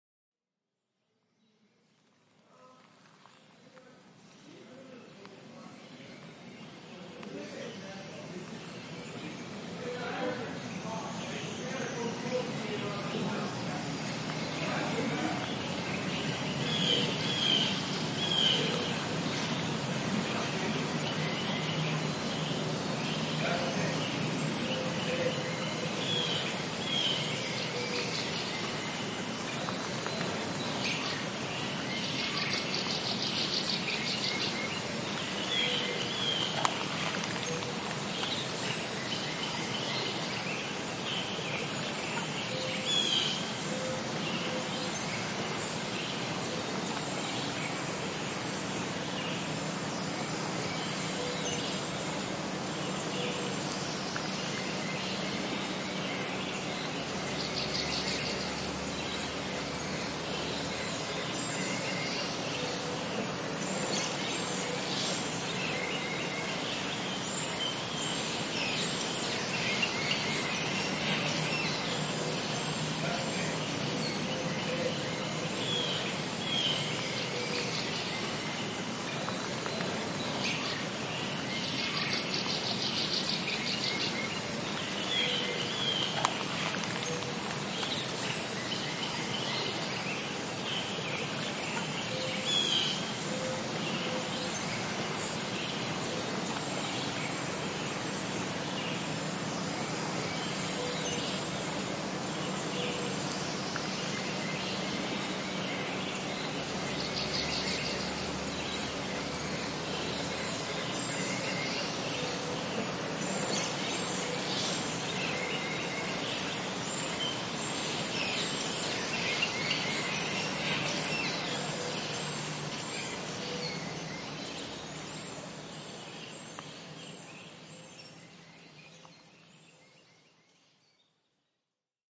birds with light stream and distant voices